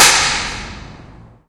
newgarage stairwell1lame
Recorded with cap gun and DS-40. Most have at least 2 versions, one with noise reduction in Cool Edit and one without. Some are edited and processed for flavor as well. Most need the bass rolled off in the lower frequencies if you are using SIR.
convolution, impulse, reverb